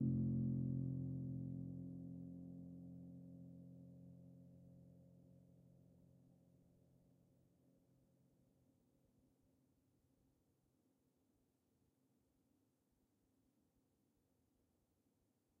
One-shot from Versilian Studios Chamber Orchestra 2: Community Edition sampling project.
Instrument family: Keys
Instrument: Upright Piano
Note: E#1
Midi note: 29
Midi velocity (center): 30
Location: UK
Room type: Medium Room
Microphone: Rode NT5 Spaced Pair (player position)
Performer: Simon Dalzell